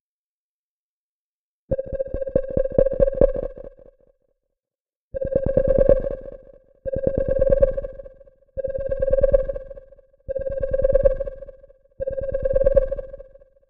140 bpm ATTACK LOOP 1 ELEMENT 4 mastererd 16 bit
One of the elements of the complete loop. A very specific sound played
six consecutive times in a crescendo: the first time in half the tempo
as the following. The sound reminds me of a sonar sound with a lowpass
filter on it. Mastered down to 16 bits using mastering effects from
Elemental Audio and TC.
beat, drumloop, techno